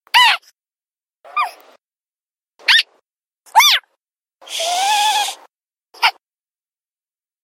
Some random sounds I recorded when I got bored. I was randomly speeding up videos which I did in Windows Movie Maker and recorded bits in my recorder that I find kinda cute or funny.